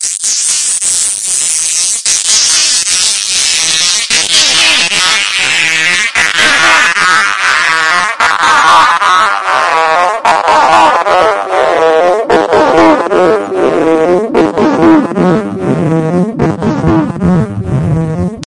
strange beat
weird, ship, aliens, explosion, noise